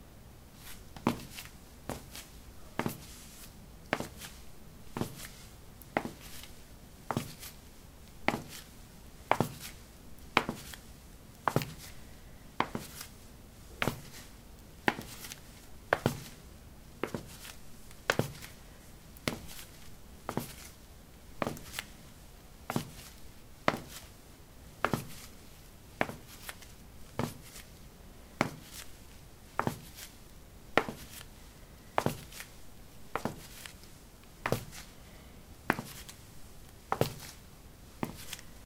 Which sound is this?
ceramic 07a leathersandals walk
Walking on ceramic tiles: leather sandals. Recorded with a ZOOM H2 in a bathroom of a house, normalized with Audacity.